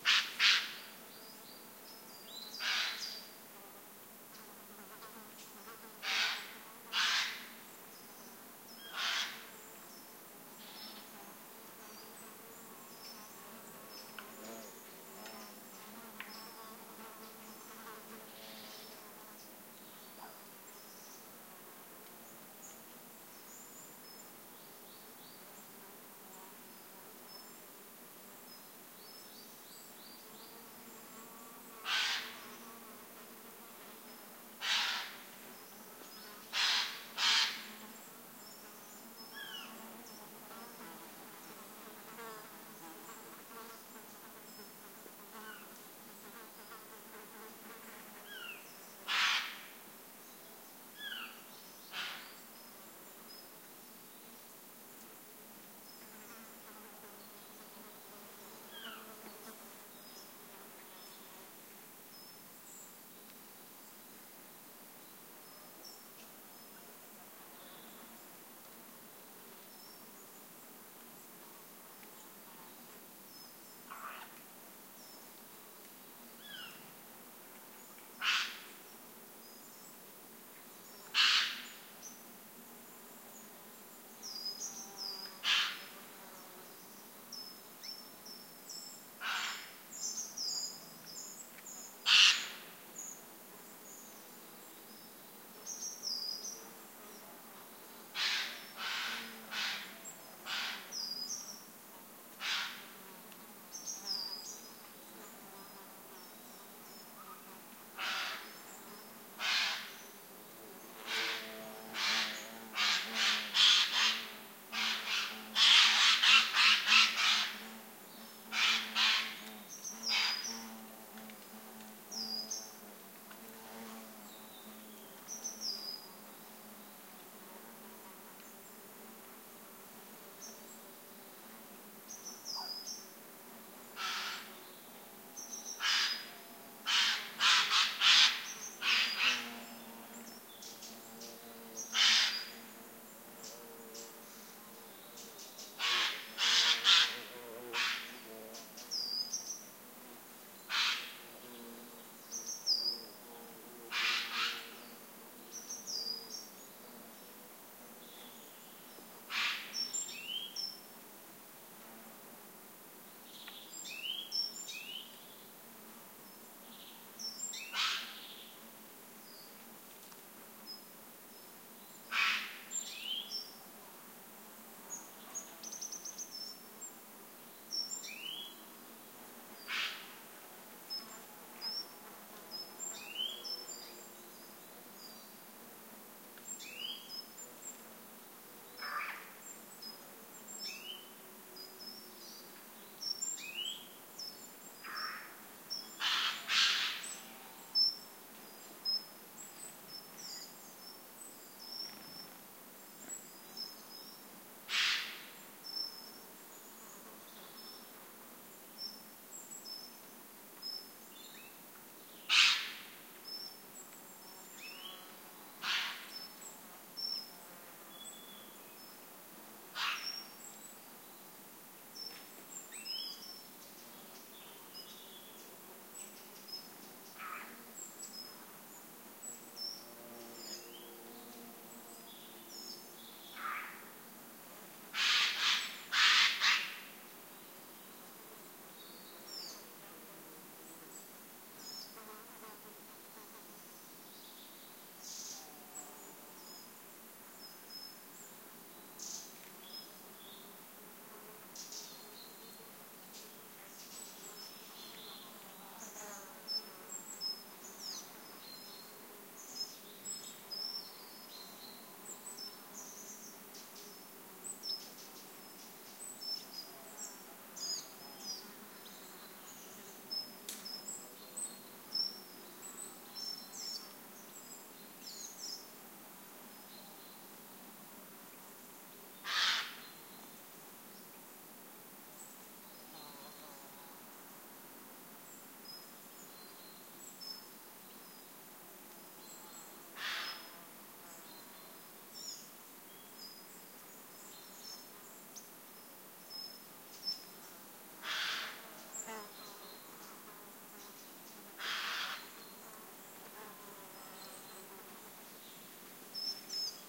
20151113 03.forest.jays
Harsh Jay callings and forest ambiance with many singing birds and buzzing insects. This sample was recorded right below the crown of Pinsapo de las Escaleretas monumental tree, at 1000 m above the sea on Sierra de las Nieves Natural Park (Ronda, S Spain) during an unusually warm autumn. Sennheiser MKH60 + MKH30 into Shure FP24 preamplifier, PCM M10 recorder. Decoded to Mid-side stereo with free Voxengo VST plugin.
ambiance, autumn, birds, field-recording, forest, garrulus-glandarius, insects, Jay, mountains, nature, south-spain